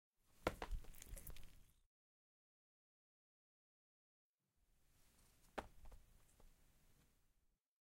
3 Cat, jump
Cat is rebounding